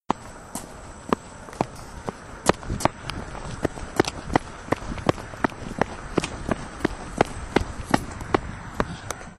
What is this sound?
Running at night
The hurried steps of
sneakers against sidewalk pavement
in the darker hours.
pavement, motion, footsteps